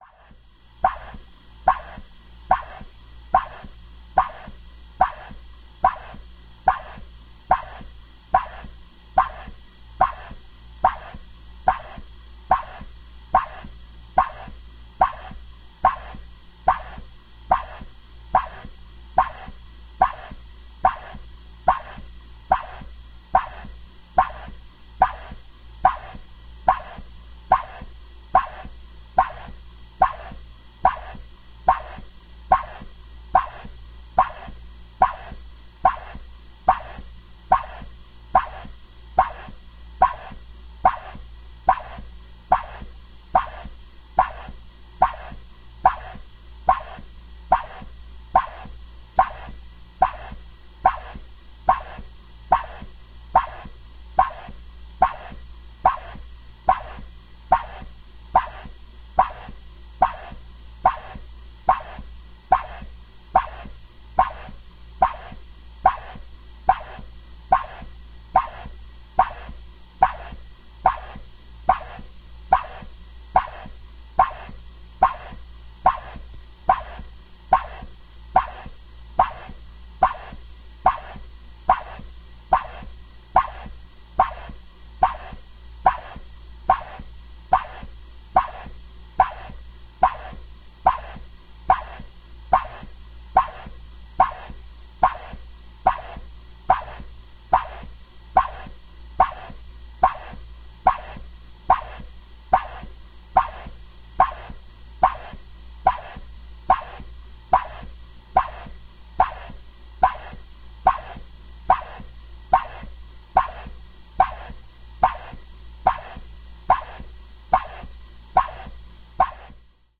CTI Cryocooler Supply Hose
Contact microphone recording of the supply side hose on a CTI cryocooler
Mono, Industrial